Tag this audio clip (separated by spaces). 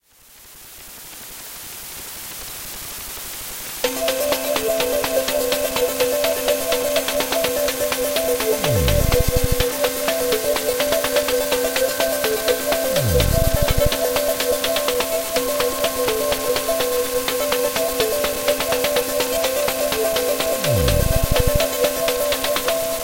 ambient bass beat blippy bounce club dance drum drum-bass dub dub-step effect electro electronic experimental game game-tune gaming glitch-hop humming hypo intro loop pan rave synth techno trance waawaa